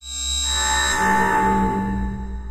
monster appearing sound
I recorded this in Ableton using one of the instruments.
appearing, Monster, background-change, change